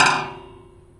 steel bench hit.4
One school steel bench one drumstick and h4n zoom.
bench, h4n